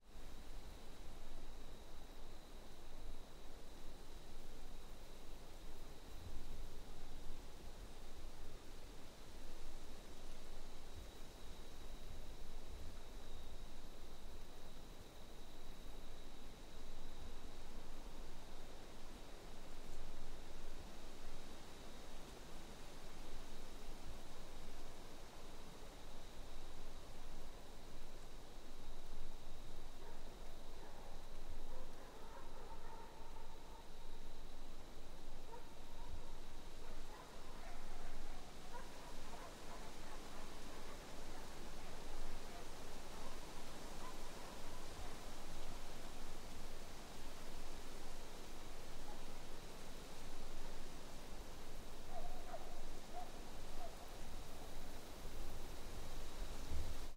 This is a recording of ambient sound on a farm/horse ranch.